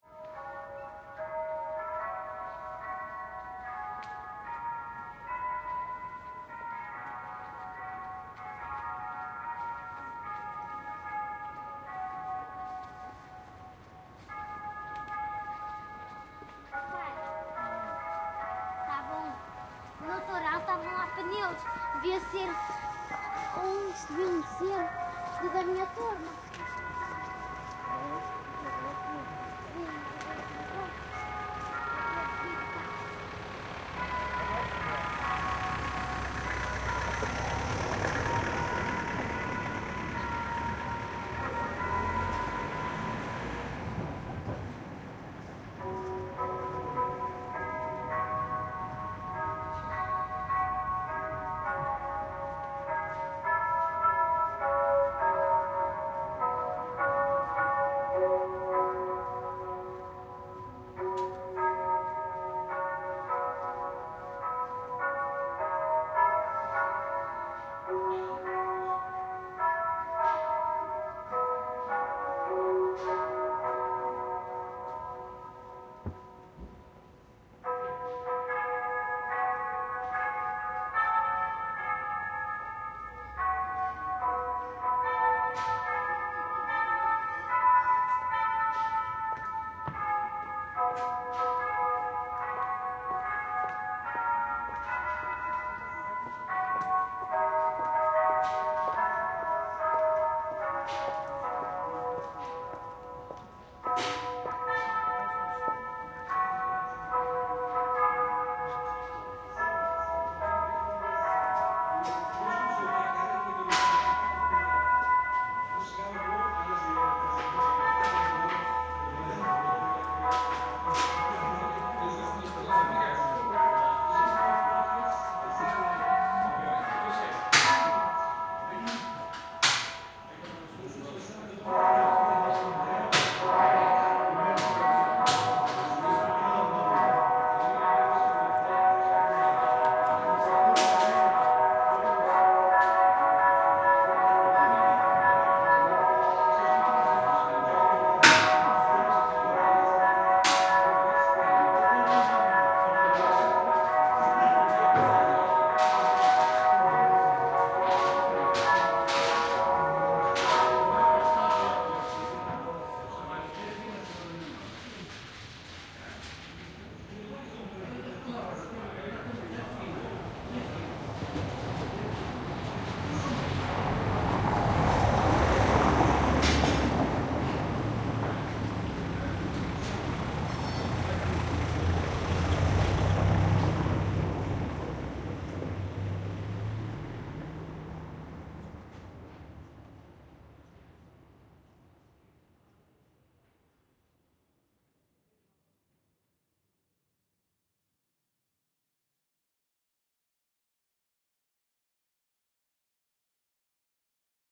walking in a street in guimaraes portugal with microphones in my ears.